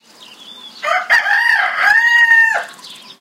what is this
20170507 rooster.crowing
rooster cock-a-doodle-doo. Primo EM172 capsules inside widscreens, FEL Microphone Amplifier BMA2, PCM-M10 recorder. Recorded near Bodonal de la Sierra (Badajoz province, Spain)
morning, cock